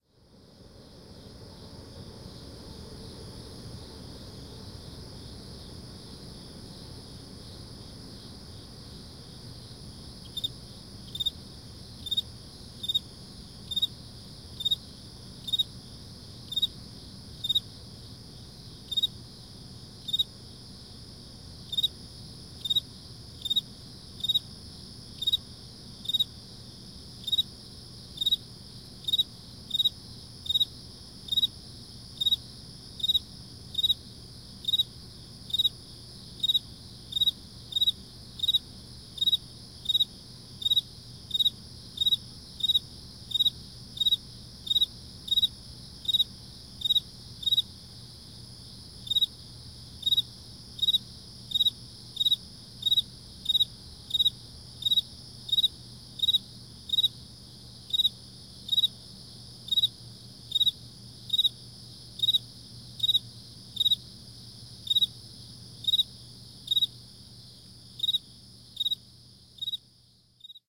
The beautiful, simple call of the field cricket is one of my favorite insect sounds. In late summer though the huge choruses of katydids and cicadas often drown out the simple beauty of this love song. Later, as the season progresses into Fall and the temps dip to where the cicadas and katydids no longer sing, these simple, beautiful Field Cricket calls still last up to the time that the days just can't stay warm any longer. I like to see how late into the season I can hear these guys---something just hauntingly mesmerizing hearing this sound and no other on a mild Autumn day, after a cold night..
I was fortunate enough to capture this recording on September 4th 2013 in my backyard. Recording made around 4:30 in the morning with my H4N recorder using its internal built-in microphones.